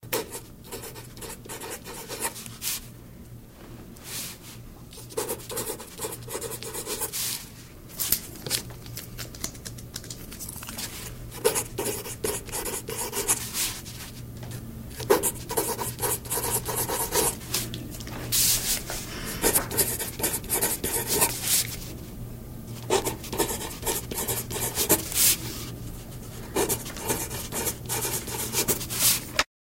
Escritura a mano en papel. Handwriting on paper.